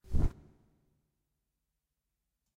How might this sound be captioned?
BirdFlap3 Deeper
Fluttering sound for use in game development.
wing, bird, wings, flap